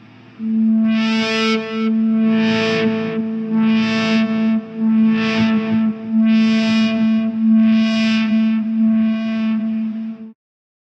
Guitar swell 1
Guitar swell using a pod xt and a tokai strat and the volume control.